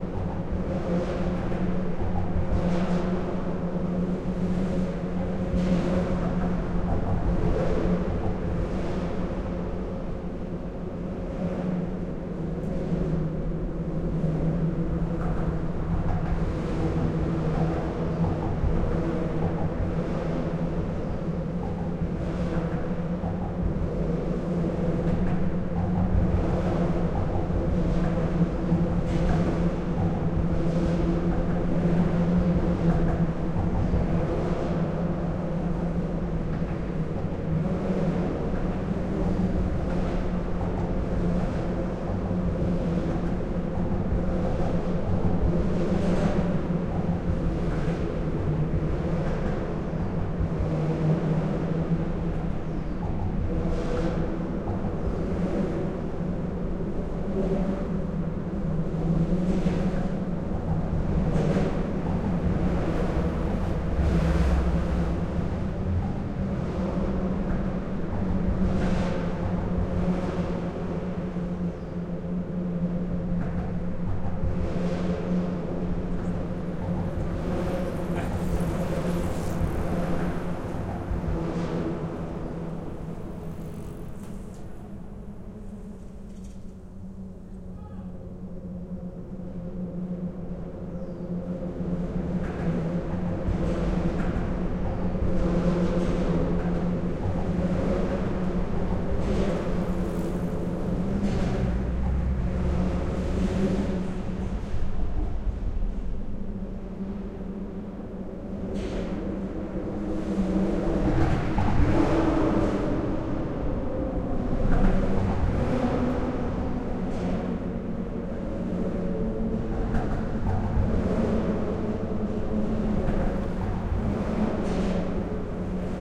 Cars passing on a metal bridge, weird metallic re-verb, recorded from under the bridge, semi interior re-verb, recorded with microtrack stereo T-microphone
pass, reverb, cars, metal, bridge